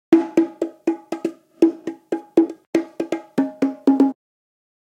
JV bongo loops for ya 1!
Recorded with various dynamic mic (mostly 421 and sm58 with no head basket)
congatronics, loops, tribal